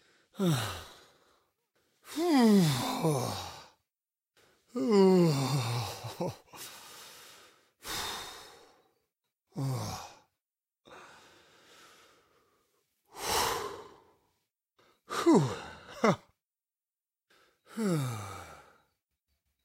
AS012949 relief
voice of user AS012949
comfort, solace, relief, male, human, man, vocal, voice, wordless, consolation, cheer